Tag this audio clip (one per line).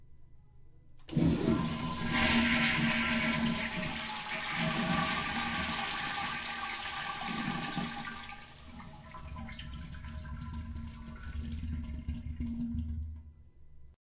flush
toilet